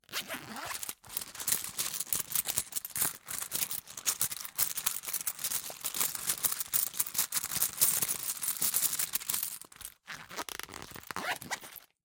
Purse - rummaging for change and zipping up.
change, close, coins, drop, jingle, open, purse, rummage, rustle, shake, zip, zipper